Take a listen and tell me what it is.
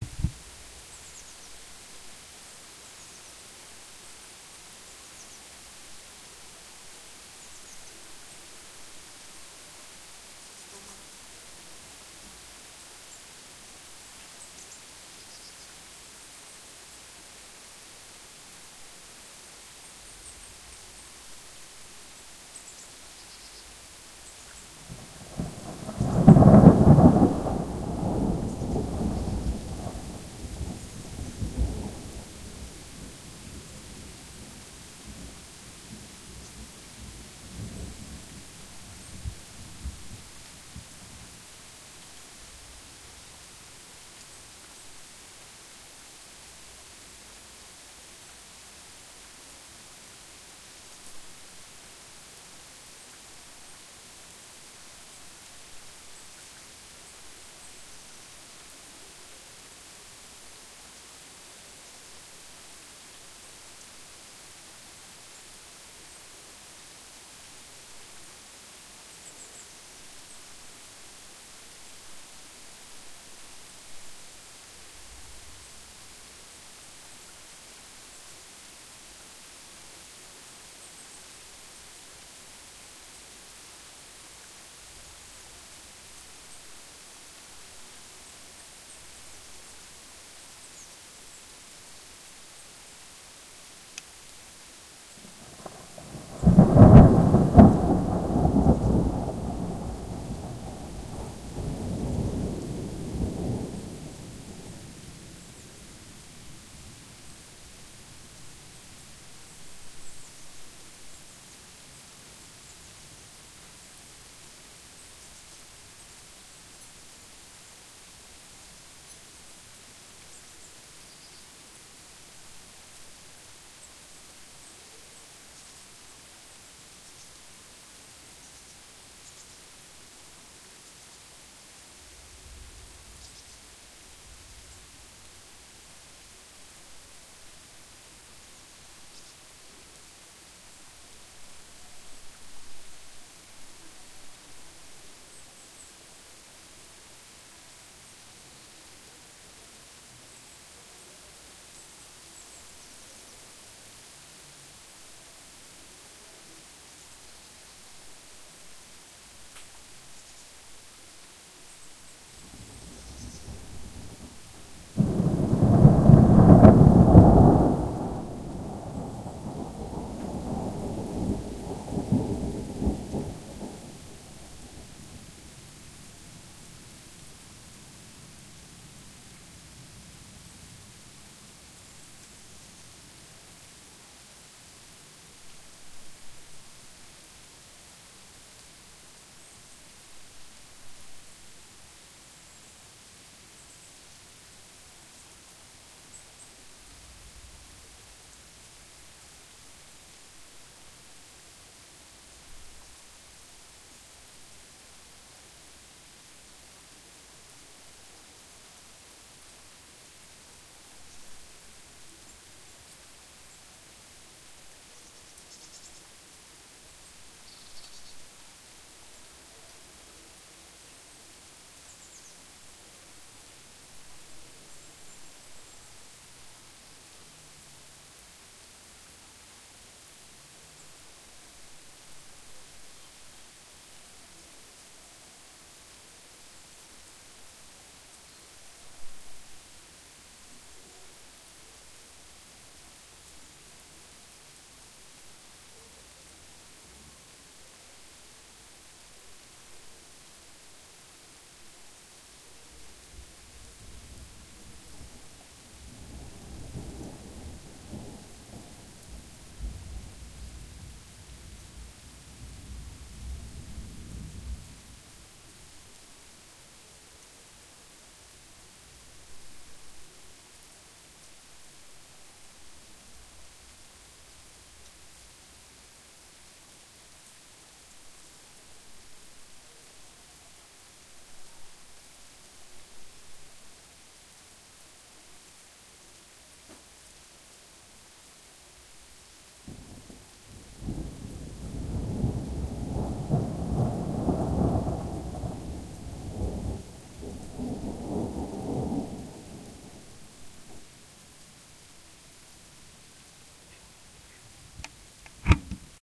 Rain in the wood
Rain and electrical storm taken in an Italian wood with tascam dr40
Rain, wood